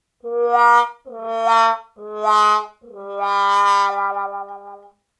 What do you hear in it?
wah wah sad trombone
recording of myself playing a well known trombone tune.
recording device: Zoom H2next
sad, brass, wah-wah, trombone, failure, music